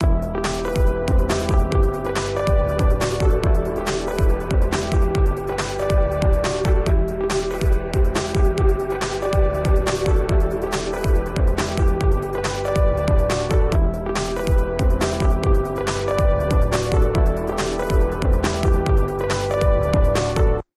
made it for brouser flash game